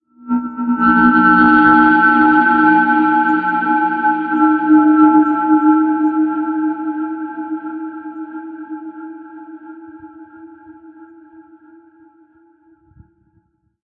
Samurai Jugular - 30
A samurai at your jugular! Weird sound effects I made that you can have, too.